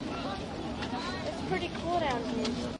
nyc esb observatorysnippet
Snippet of audio from the observatory of the Empire State Building in Manhattan recorded with DS-40 and edited in Wavosaur.
empire-state-building, new-york-city, field-recording